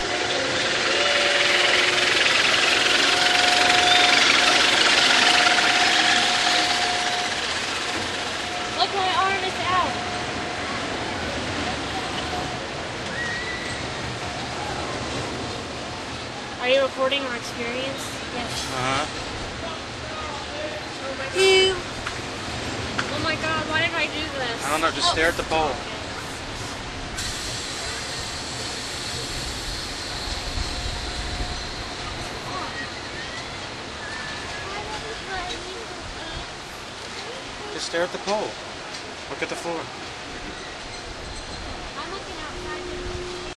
On the ferris wheel at Wonderland Pier in Ocean City recorded with DS-40 and edited and Wavoaur.